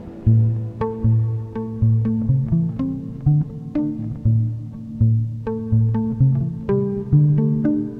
A riff on electric guitar bring processed by VST Effects.